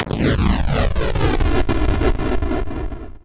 Tremolo explosion
A slight explosion with a tremolo effect as its volume fades in and out every second. Created using SFXR
game, computer, sfxr, boom, 8-bit, kaboom, retro, chip, noise, 8bit, video, arcade